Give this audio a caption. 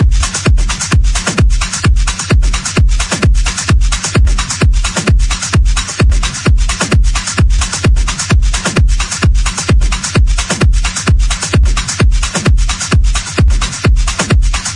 HARD TECHNO DRUM BEAT LOOP 130 BPM
130, BEAT, BPM, DRUM, HARD, LOOP, TECHNO